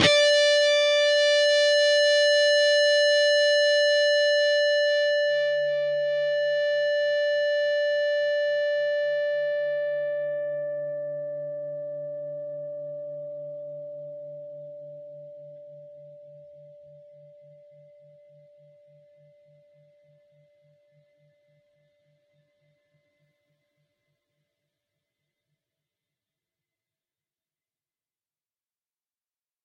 Dist sng D 4th str 5th frt Hrm
D (4th) string, 5th fret harmonic.
distorted, guitar, single-notes, distorted-guitar